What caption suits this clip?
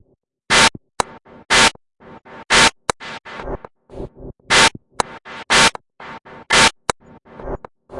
A four bar electronic loop at 120 bpm created with the Massive ensemble within Reaktor 5 from Native Instruments. A loop with an noisy electro feel. Normalised and mastered using several plugins within Cubase SX.